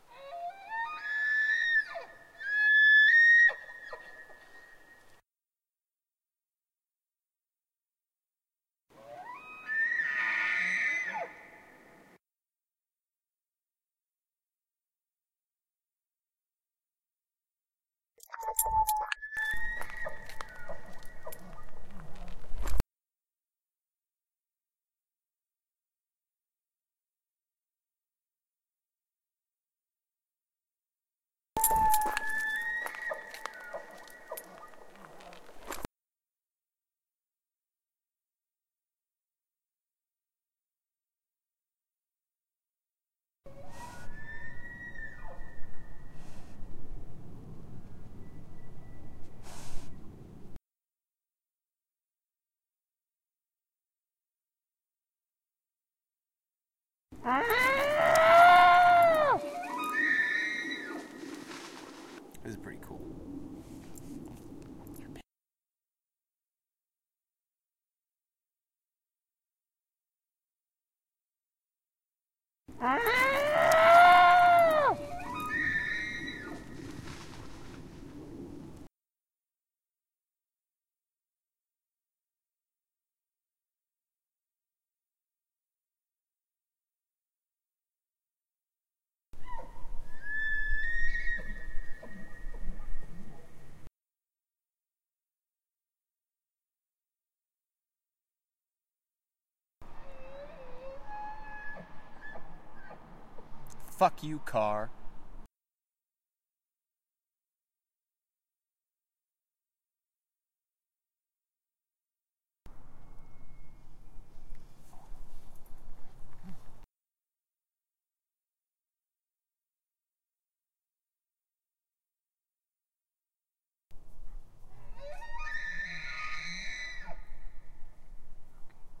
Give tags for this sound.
Call Fall Rut

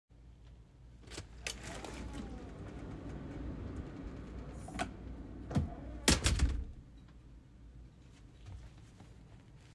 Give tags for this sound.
close closing door hallway heavy iron loud open opening quiet studio thud

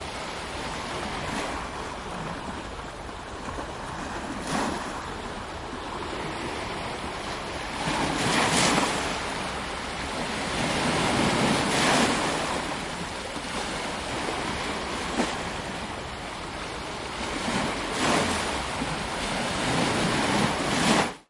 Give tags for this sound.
beach coast Cyprus field-recording ocean people sea seaside shore water waves

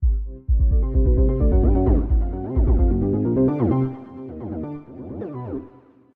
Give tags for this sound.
cartoon loop funny silly Alien

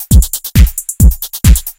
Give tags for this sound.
electronica drum

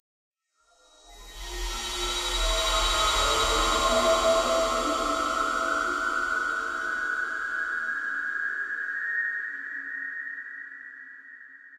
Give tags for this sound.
Outer-Space Sci SciFi Space Spaceship VST

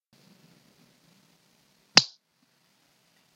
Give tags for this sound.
clap hand highfive

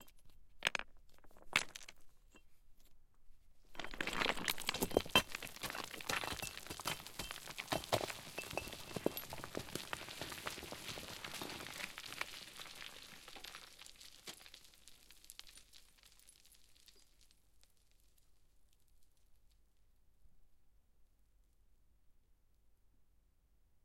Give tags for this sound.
falling rocks stone

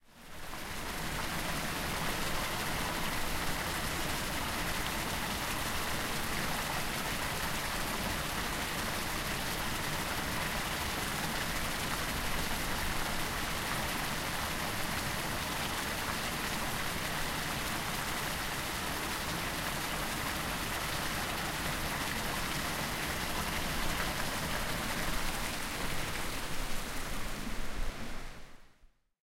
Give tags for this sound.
mall foley fountain waterfall Water splashing

